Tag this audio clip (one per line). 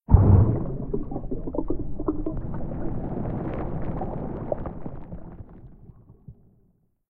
sea
field-recording
ocean
under-water
bubbles